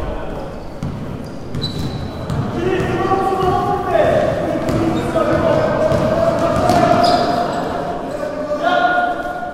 basketball game, coach/people shouting and the sound of players running (squeaky shoes).